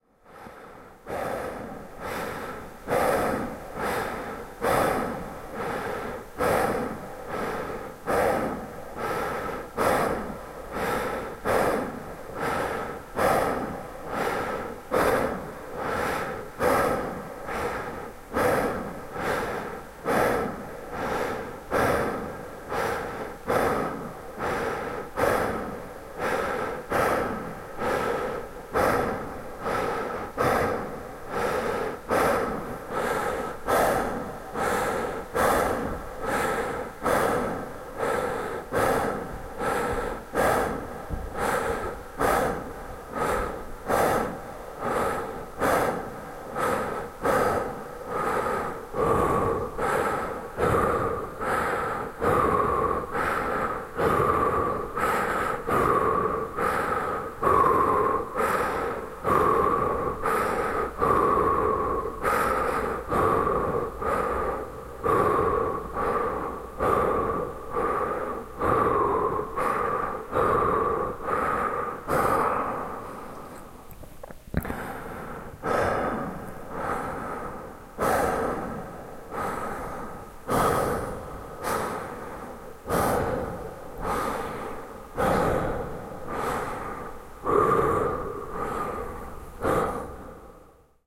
A man breathing.